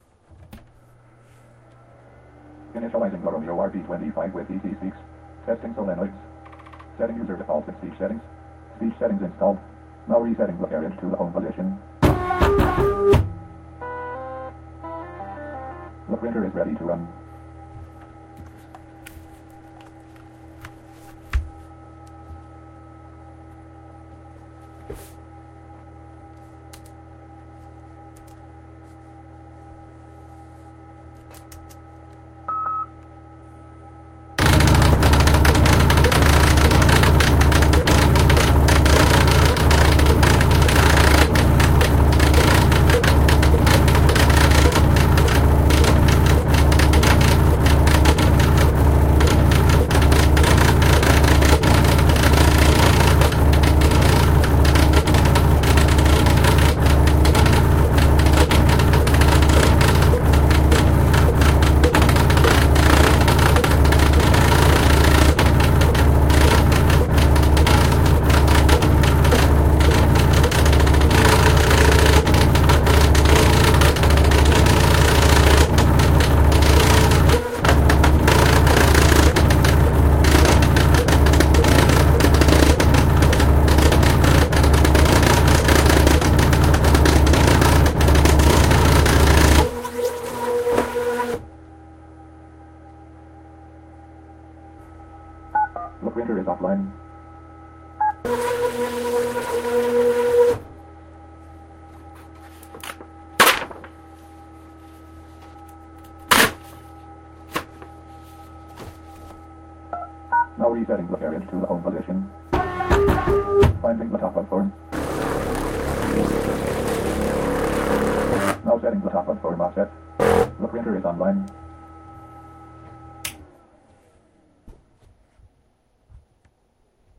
braille embossing job with braille embosser
This is a Romeo RB25 printing a a document from a braille note. This unit prints at a speed of 25 characters per second.
blindbraille, embosser, embossing, RB25, Romeo, speech, text